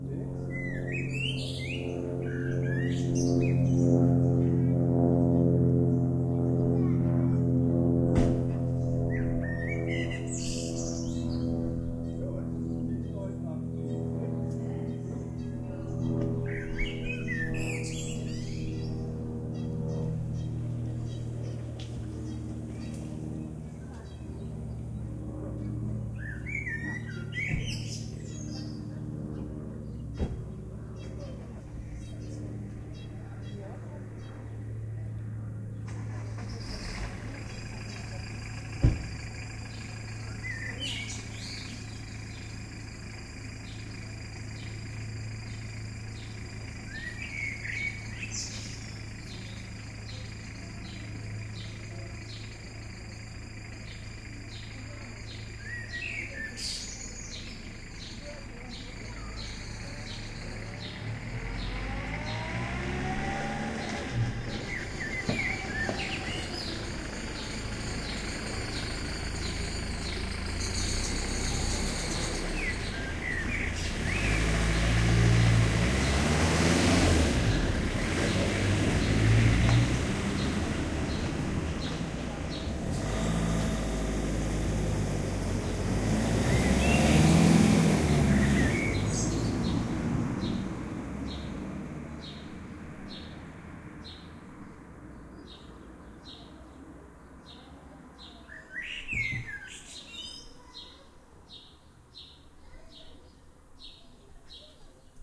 garden heighborhood two cars
neigborhood atmo summer - birds close - cessna passing in distance - some voices far away -2cars
leaving and comming duration 1:45 min
AB, Austria, cars, field-recording, nature, neighbors